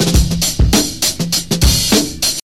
Old School Break Beat/Big Beat Drums
A beat I sampled for an old mix I did. recently unearth.